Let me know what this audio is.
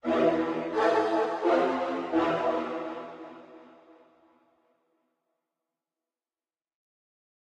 Krucifix Productions 2018 Unsettled Visions
cinematic, design, drama, dramatic, film, films, movie, movies, music, scoring, sinister, sound, sounds, soundtrack, spooky, suspense, terrifying, terror, thrill